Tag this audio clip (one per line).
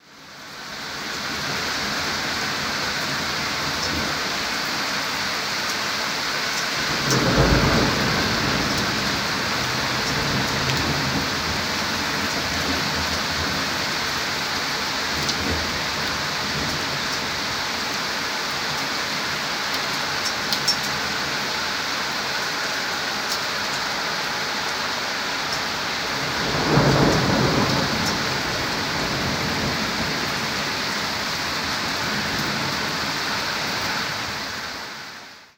field-recording
thunderstorm
lightning
rain
thunder
weather
rainstorm
wind
nature